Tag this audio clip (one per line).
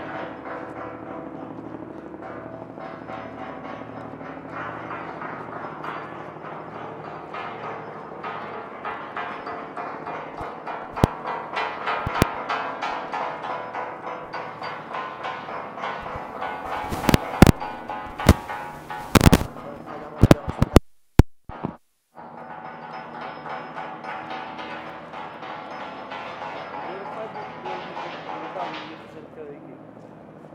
beats
workers